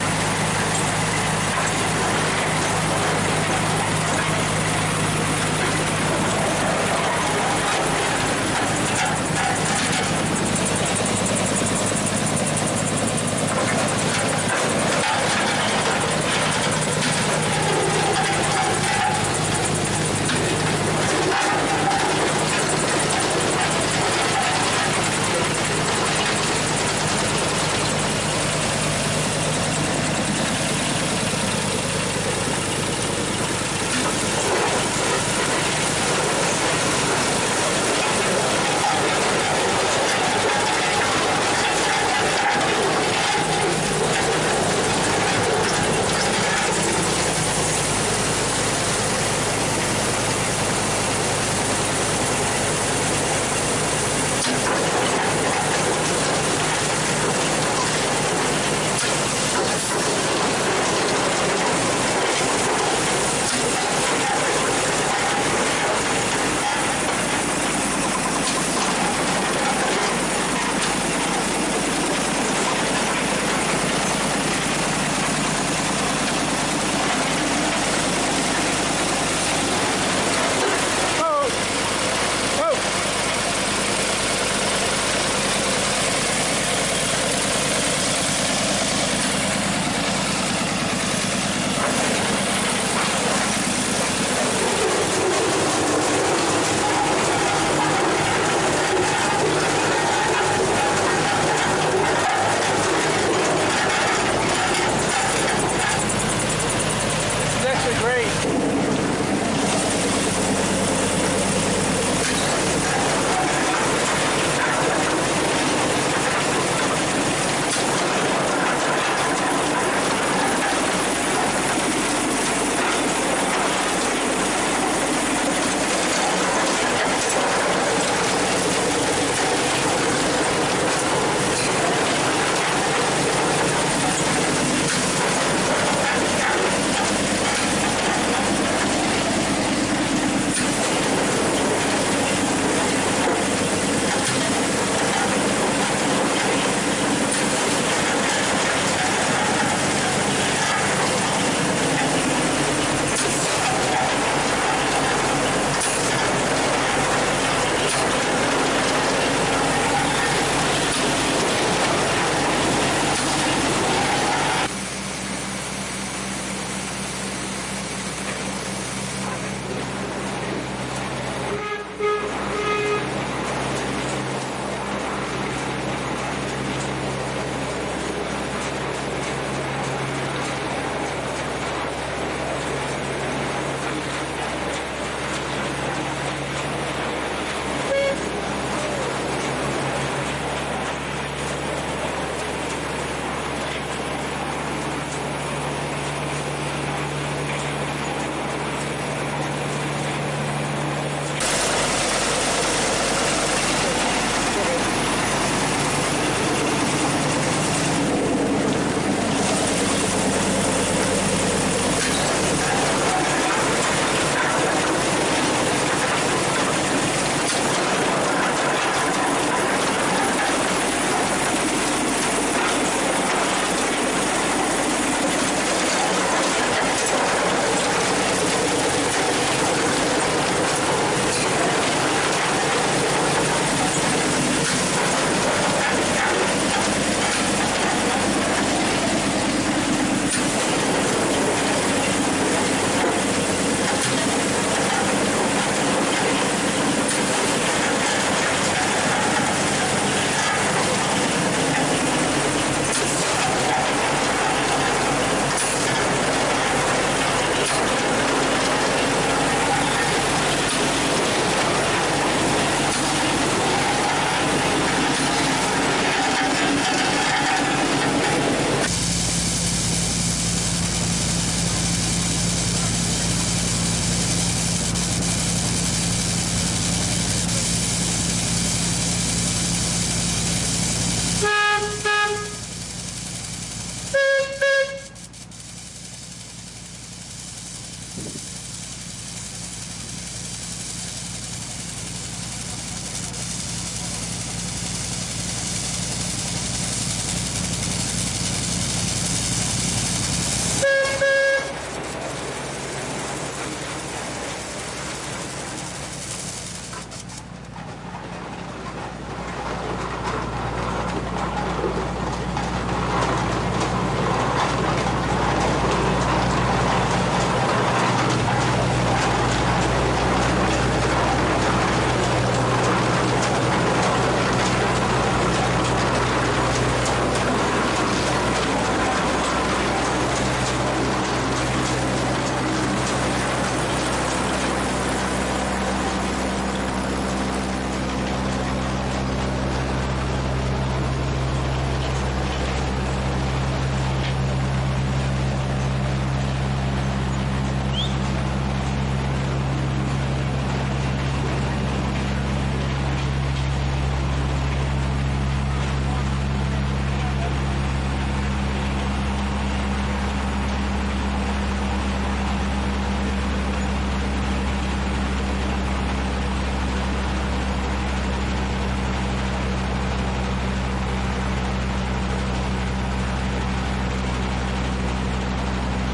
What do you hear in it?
A huge steam tractor used in the early 1900's for running primarily farm equipment. Recorded at the Miracle of America Museum in Polson, Montana.